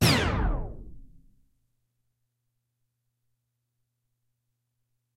tape slow4
Pieces to create a tape slowdown effect. Recommend combining them with each other and with a record scratch to get the flavor you want. Several varieties exist covering different start and stop pitches, as well as porta time. Porta time is a smooth change in frequency between two notes that sounds like a slide. These all go down in frequency.